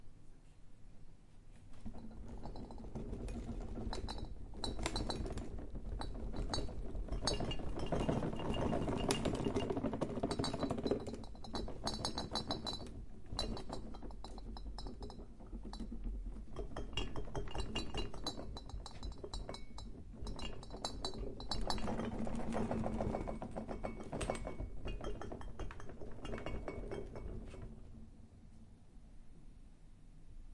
Glassware rattle and shake movement
Glassware rattling and shaking on table. Could be used for earthquake, construction outside of the house or things along that line. Recorded with a Zoom H5 internal XY mics.